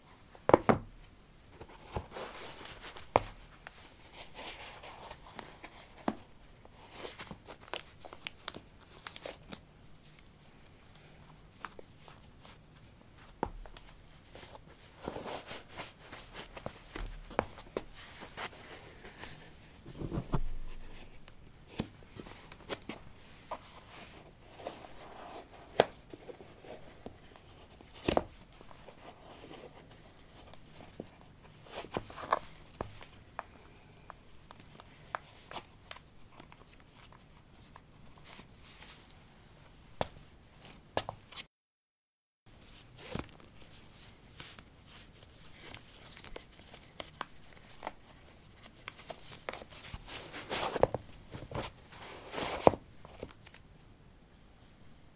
paving 10d startassneakers onoff
Putting low sneakers on/off on pavement. Recorded with a ZOOM H2 in a basement of a house: a wooden container filled with earth onto which three larger paving slabs were placed. Normalized with Audacity.